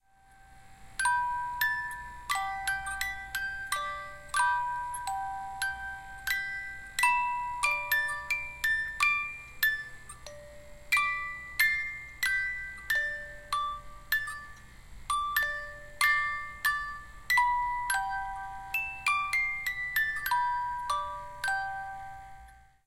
Music Box phrase
This is a small phrase from a wind-up music box that's been in my family for decades. It's over 50 years' old, probably much older. Unfortunately, I have no idea what the melody is or who wrote it. All I know is that the mechanism is made in Switzerland.